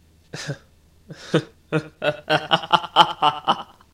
Echoing Laughter
A male laughing/cackling in a somewhat evil manner.
Recorded using my Turtlebeach Earforce-X12 headset and then edited in Audacity to add echo and sound more like a man.
This was originally recorded for use in my own project but here, have fun.
cackle, creepy, echo, echoing, evil, human, laugh, laughing, laughter, male, man, vocal, voice